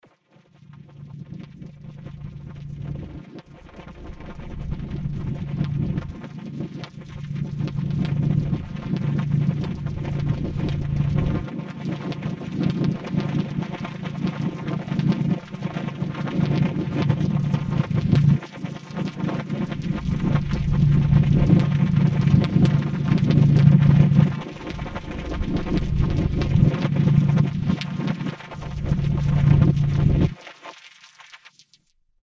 synthesized data soundscape, ghosts in the machine
computer, data, machine